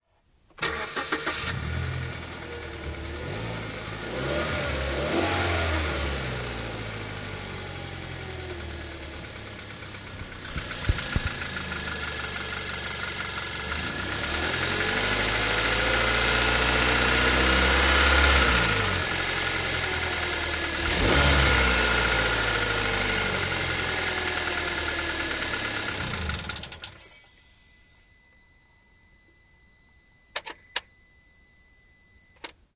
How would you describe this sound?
A Hyundai IX35 can be heard.
It is started, runs a bit with isolated revs, and is turned off again.
Before and after startup you can hear the car's control electronics.
Equipment:
Beyerdynamic MCE 86 N(C)
Zoom H4n
File:
Mono
My file naming scheme follows the UCS (Universal Category System) guidelines.